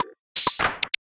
clean percussion rhythm modelled on the tick tock of a clock